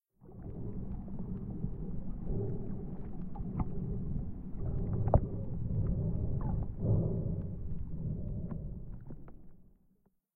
Under Water Breathing
A short out-take of a longer under water recording I made using a condom as a dry-suit for my Zoom H4n recorder.
Recorded while snorkeling in Aqaba, Jordan. There we're a lot of beautiful fish there but unfortunately they didn't make a lot of sounds.
bubbles, field-recording, under-water